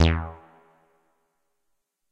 MOOG BASS SPACE ECHO E
moog minitaur bass roland space echo
space, roland, bass, minitaur, moog, echo